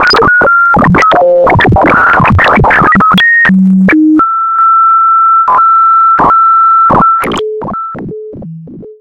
Starting with a broken beep, followed by some sounds that remind me of Spectrum games cassettes and ending with some 'echo' kind of sounds as I lowered the feedback volume..
Created with a feedback loop in Ableton Live.
The pack description contains the explanation of how the sounds where created.
feedback, bleep, fake-spectrum, beep, pitch-tracking, Frequency-shifter, broken, circuit-bent